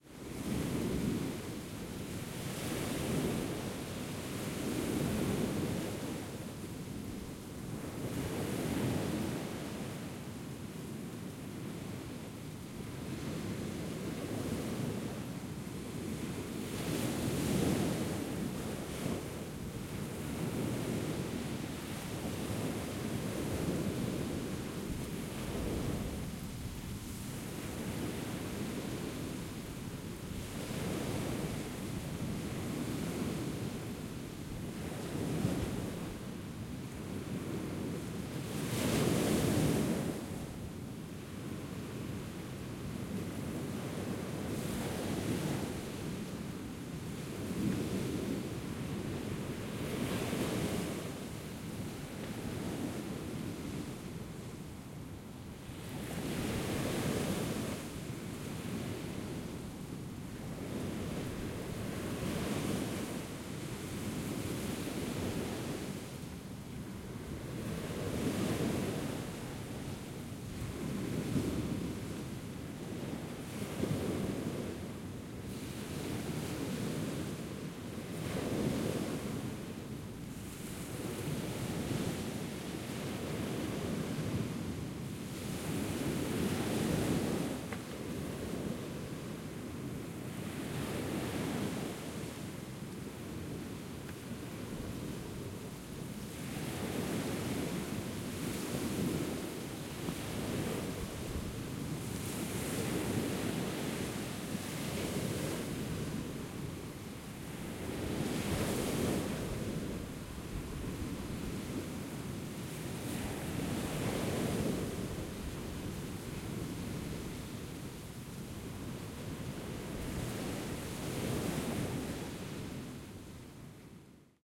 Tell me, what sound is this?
Beach Waves Distant
Field recording of the beach, Recorded with a Zoom H4n.
For more high quality sound effects and/or field-recordings, please contact us.